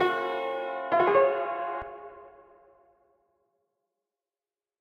Sci-Fi tannoy sound effect
reel to reel piano kinda thing.
alien, android, application, computer, gadget, galaxy, game, interface, mechanical, Outer-Space, robotic, Sci-fi, space, spaceship, station